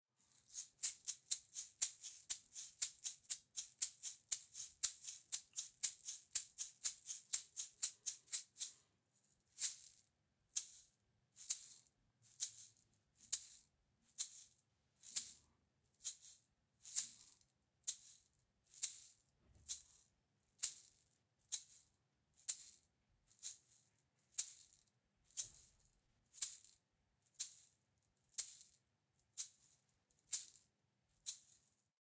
Salsa Eggs - Blue Egg (raw)
These are unedited multihit rhythm eggs, and unfortunately the recording is a tad noisy.
egg, latin, multi-hit, percussion, plastic, rhythm, samples, unedited